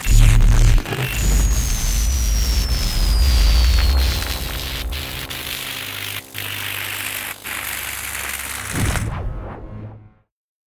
Sounds developed in a mix of other effects, such as electric shocks, scratching metal, motors, radio and TV interference and even the famous beetle inside a glass cup.